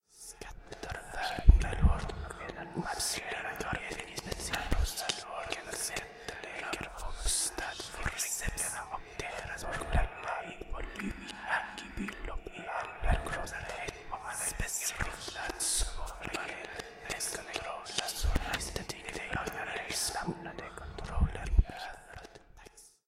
Demonic voice 002
Quick reverse reverb on a few layers of Swedish lines i was doing for a roleplay game. Enjoy.
anxious, phantom, Gothic, spooky, dramatic, nightmare, scary, bogey, hell, haunted